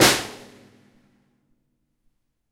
Individual percussive hits recorded live from my Tama Drum Kit